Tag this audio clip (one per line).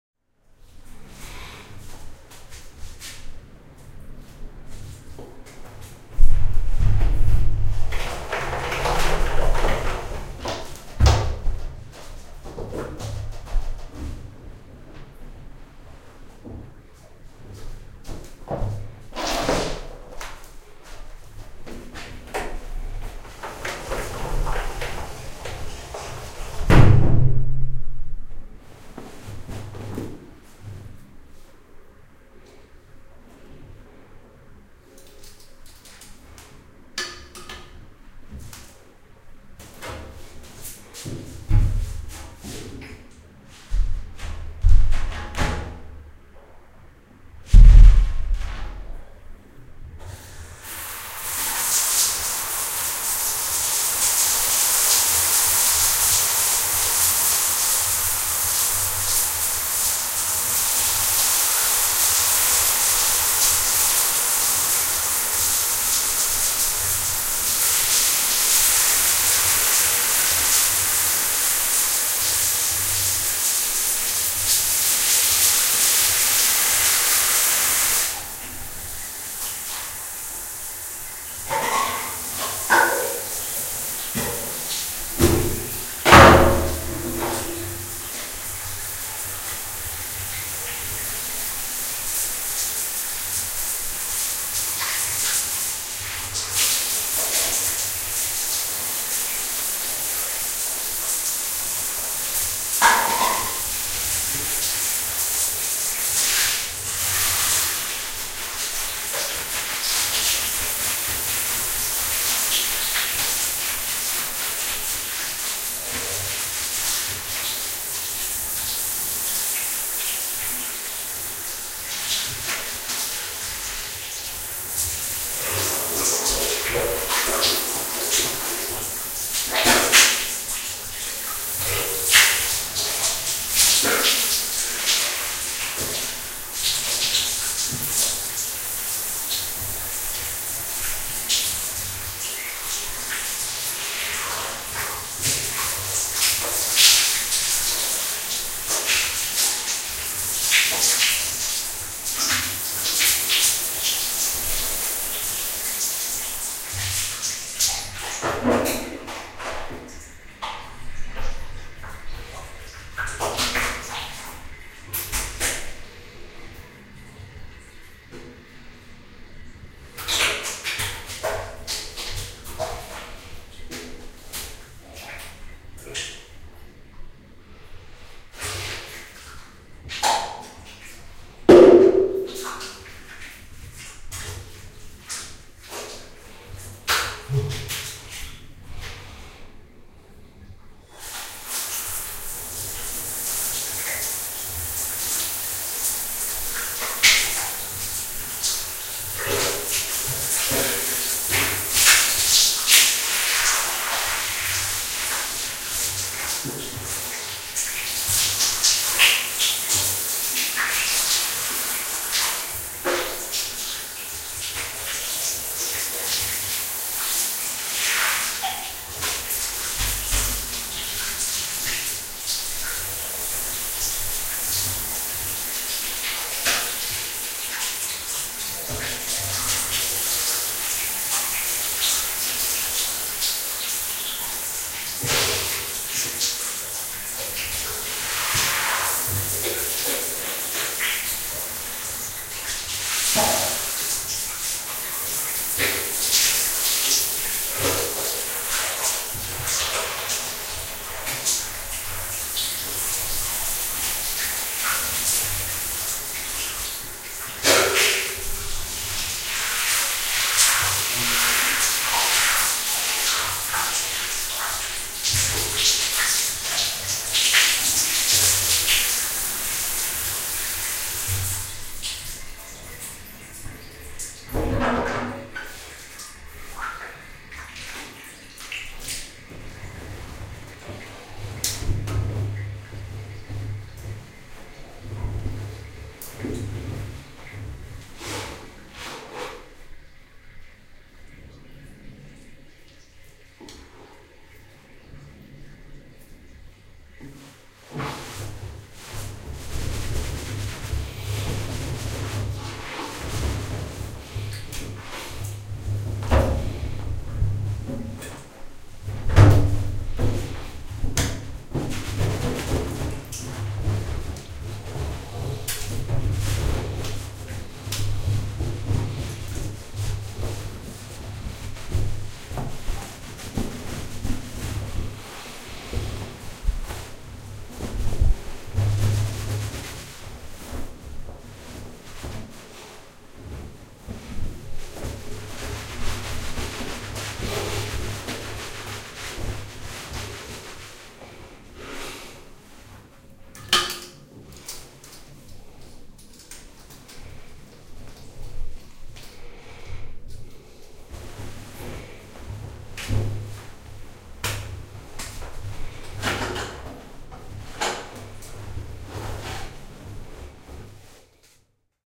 bath
water
splash
shower
wet
drip